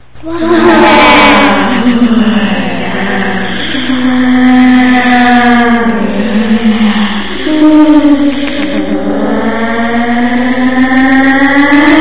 a person gets hypnotized, then suddenly snaps out of his trance.